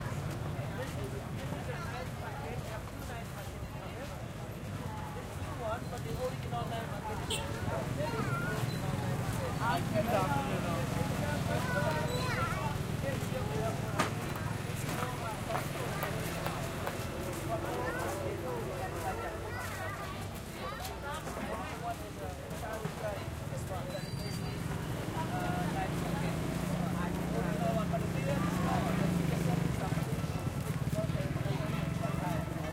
The night market in Siem Reap, Cambodia. Ambient voices and sounds
Cambodia, field-recording, street-sounds